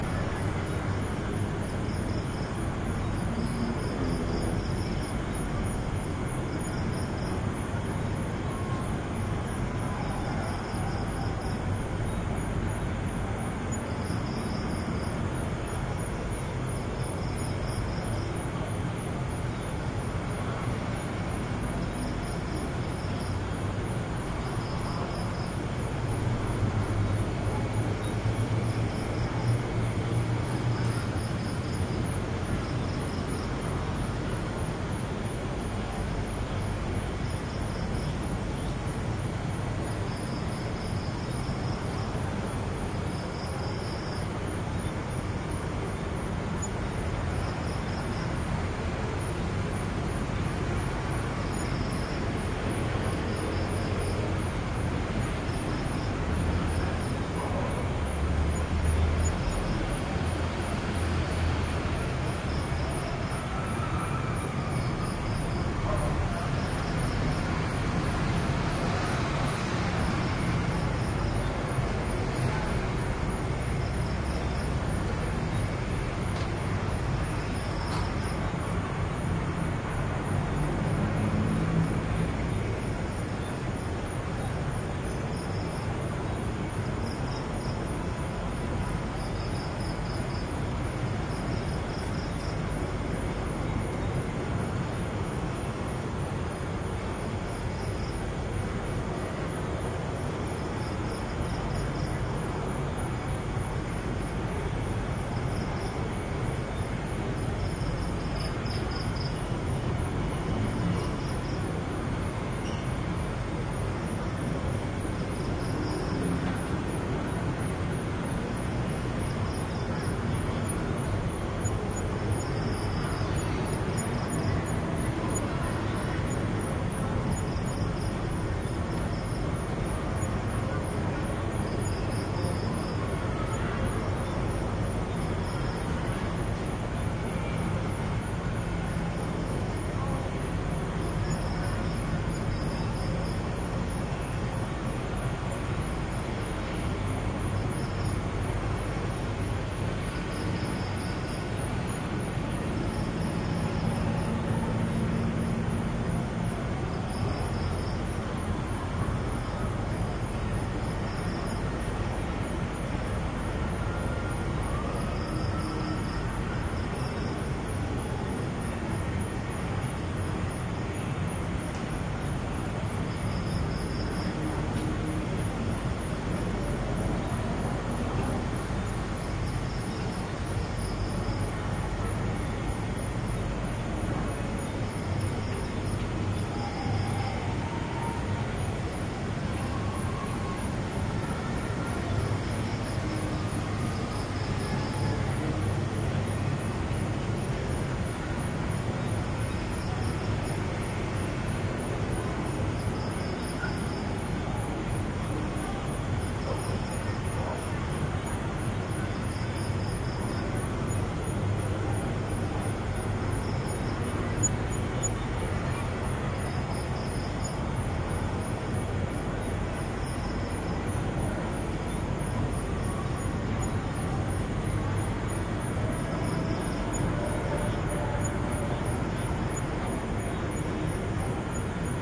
Thailand Kata small beach town skyline traffic haze from hilltop with close light crickets
small, Kata, crickets, field-recording, traffic, haze, town, Thailand, skyline, hilltop